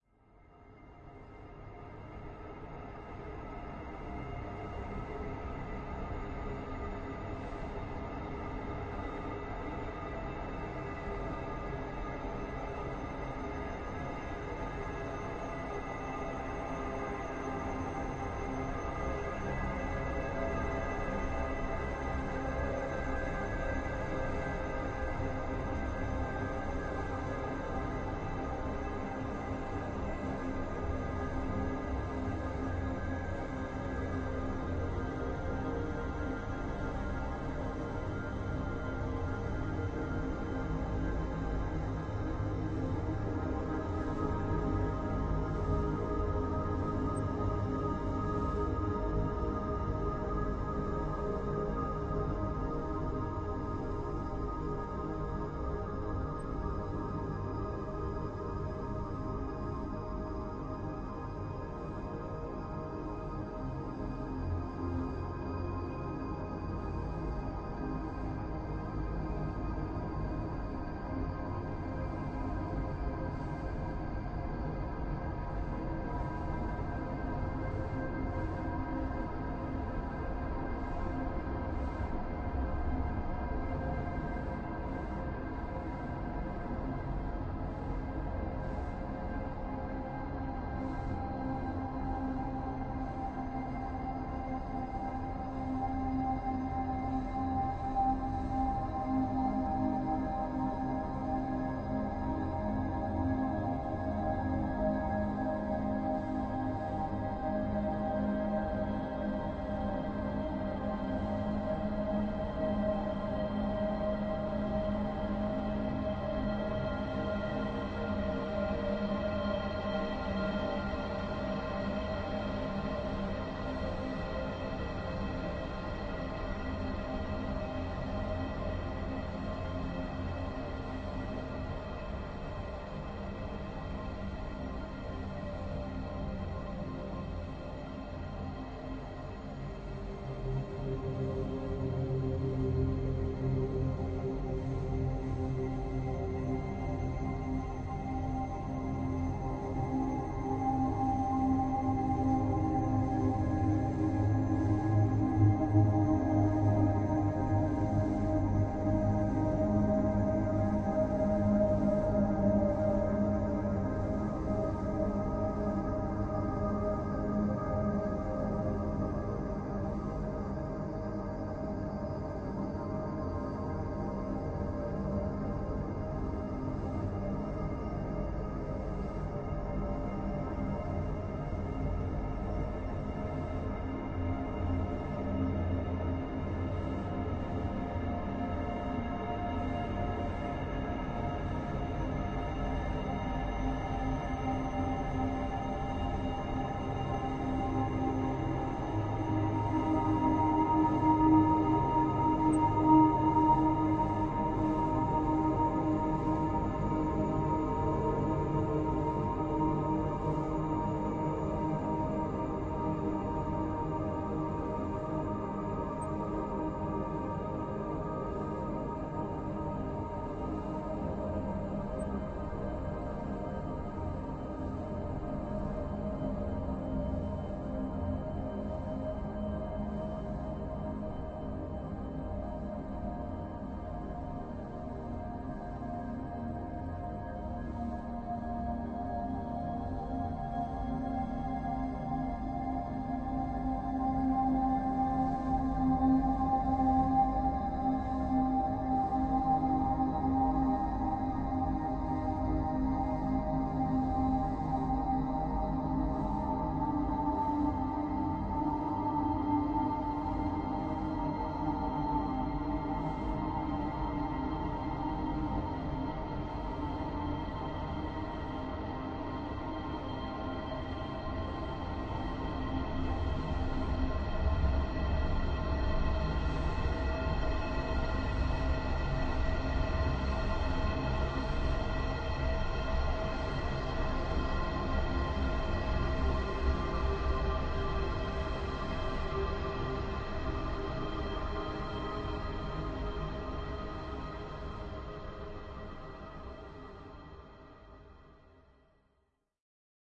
Ambience 05. Part of a collection of synthetic drones and atmospheres.
ambient, drone, atmosphere